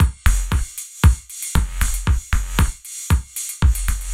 reverb short house beat 116bpm with-03
reverb short house beat 116bpm